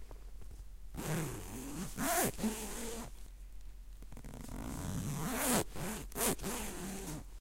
An InCase laptop cover with a MacBook in it.